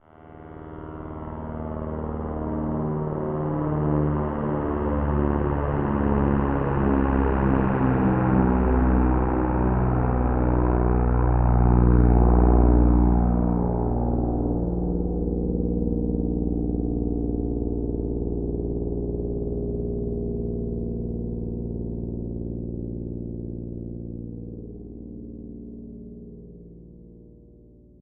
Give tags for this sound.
distant; pass-by; airplane; propeller; simulation; aeroplane; fly-by

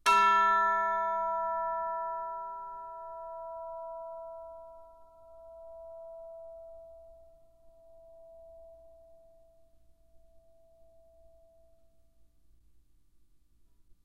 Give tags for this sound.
bells; chimes; decca-tree; music; orchestra; sample

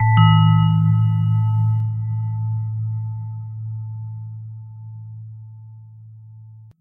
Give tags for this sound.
beep; hall; success

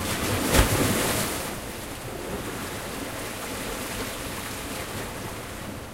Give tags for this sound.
waves; athmosphere; water; nature; field; recording; mediterranean; field-recording; mallorca

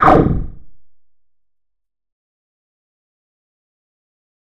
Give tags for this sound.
arcade; games; game; magic; 8bit; video; retro; animation; cartoon; nintendo; film; movie; video-game

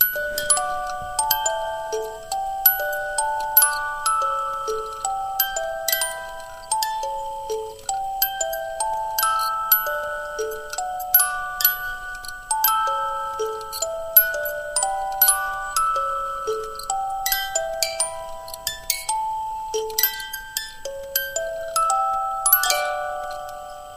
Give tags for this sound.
loop; children; box; machine; toy; music-box

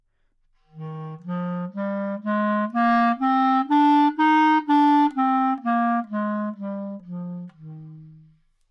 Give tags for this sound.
Dsharpmajor; good-sounds; neumann-U87; scale

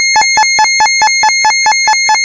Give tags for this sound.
drama; film; emergency; airplane; danger; war; military; aircraft; pilot; helicopter; jet; mayday; game; crash; flight

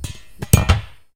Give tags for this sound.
kick; metal

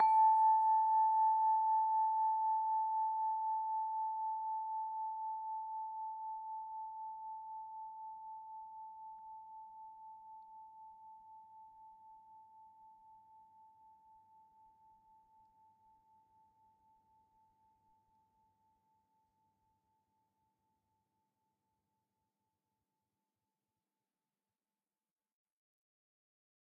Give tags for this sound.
crystal-harp; sample

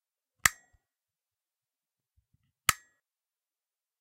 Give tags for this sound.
electric; off; electricity; domestic; switches; clunk; click; light; switch; wall

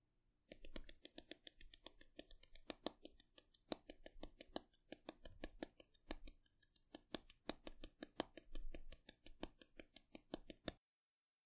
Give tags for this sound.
chattering; teeth; clacking; cold; dentist; horror; shivering

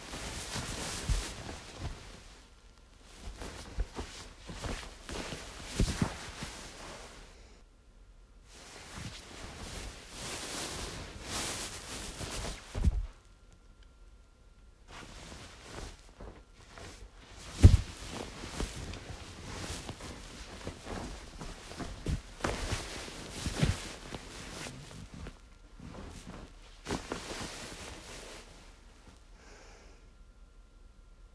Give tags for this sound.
comfortable; sheets

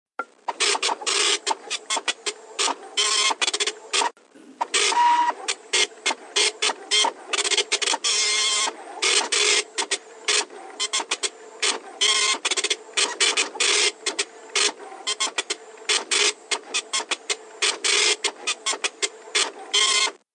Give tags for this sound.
computer; receipt; retro; printer; 1992; mechanical

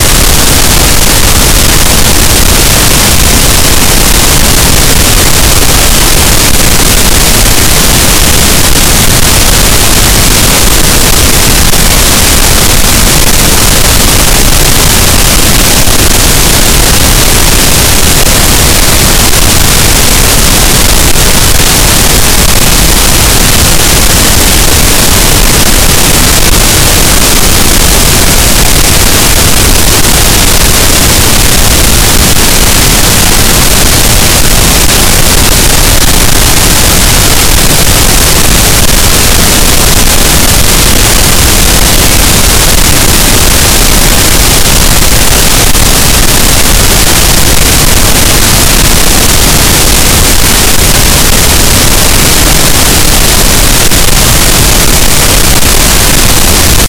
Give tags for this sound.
ear; tinnitus; earbleed

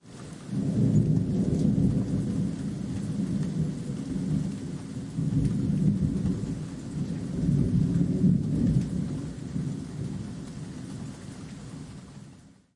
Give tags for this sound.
field-recording; thunderstorm; rain; storm; weather

